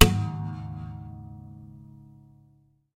My first experimental attempt at creating impulse responses using a balloon and impact noises to create the initial impulse. Some are lofi and some are edited. I normalized them at less than 0db because I cringe when I see red on a digital meter... after reviewing the free impulse responses on the web I notice they all clip at 0db so you may want to normalize them. They were tested in SIR1 VST with various results. Recorded inside a Yamaha acoustic guitar with some nice string resonance.
acoustic, convolution, free, guitar, impulse, ir, response, reverb, vintage